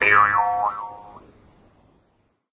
jews harp 14
A pluck on a Jew's harp.
Recorded late at night in my bedroom on a Samsung mp3 player.
Unfortunately the recording have a lot less warmth to it than the instrument has in reality.
14 of 15